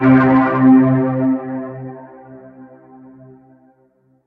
warm basssynth 4101
Just something that gives one the same comfortable, warm feeling when listening to it. I have tried to obtain a synthbass sound which is warm and slightly overdriven. Listening and watching the video on the link, I wanted something that sounded like it was coming from vintage speakers and valve based synths.These samples were made using Reason's Thor synth with 2 multi-wave oscillators set to saw. Thor's filter 1 was set to 18dB Low pass, Thor's waveshaper was used to provide a touch of soft clip followed by Filter 2 also set to low pass.
vintage, warm